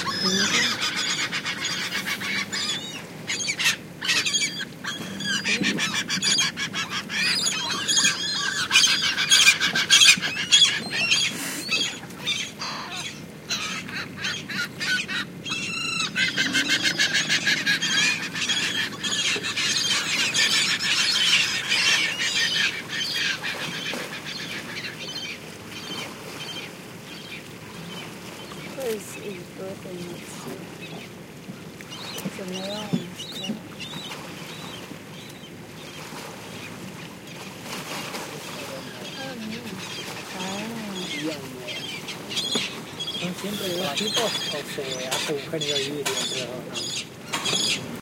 20160302 14.bird.colony
Bird screechings near a colony of Phalacrocorax magellanicus + Sea Lion growling (0:17) + some talk and breaking waves. Recorded near an island on Beagle Channel near Ushuaia (Tierra del Fuego, Argentina) using Soundman OKM capsules into FEL Microphone Amplifier BMA2, PCM-M10 recorder.